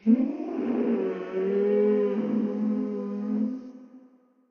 Sad Lonely Ghost Crying
Are You sure there are no sad lonely ghosts? There are! Have mercy and give them a download (hug) so that they can be happy again in a song.
ghost, thrill